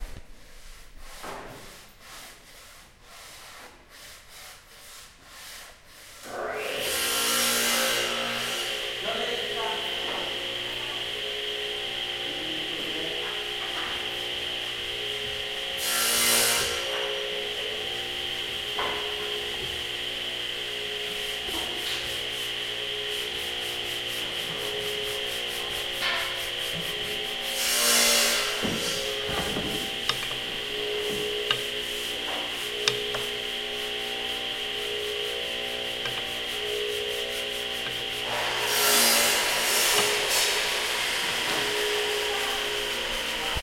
Sounds of a woodshop in Mexico, there is a saw in the background, some sanding and wood sounds